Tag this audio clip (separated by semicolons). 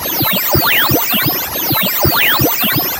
electronic; futuristic; radio; sci-fi; tuning; weird